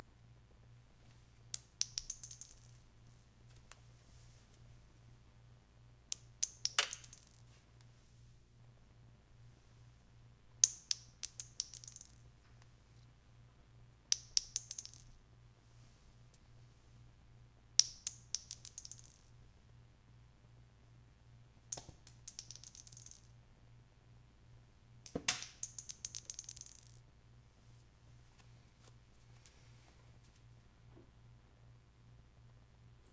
I wanted to record the sounds of shells hitting the ground for rifle or pistol audio. I took a .32 caliber shell and matching slug that I tweezed out of the wall in my old apartment after my stepdad had a bit too much wild turkey one night and decided to shoot up the living room. After removing the mushroomed slug I set about dropping it on the ceramic tile floor in front of the B-1 and UB802. There is no tempo that I know of before anyone complains about that as well.